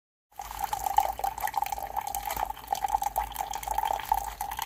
a Keurig pouring a hot apple cider